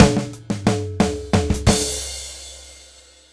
I made these loops about 1yr ago for a project I was working on. I know how difficult it is to find free drum loops in odd time signatures, so I thought I'd share them
180bpm, 4, 5, acoustic, drum, jazz, kit, loop, polyrhythm